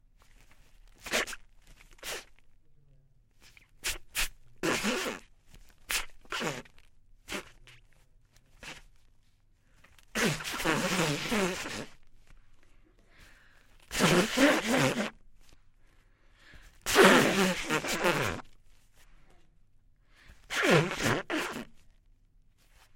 Female nose blow
A woman blow her nose.Una mujer se suena la nariz.
Blow, Cartoon, Comedy, Comical, Female, Human, Nose, Woman